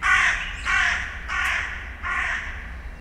4 calls of a crow flying over: ideal for the Gothic ringtone
;). Sennheiser ME 64, K 6, Quadmic into iriver ihp-120.
craw, crow, environmental-sounds-research, field-recording, fieldrecording, raven, ringtone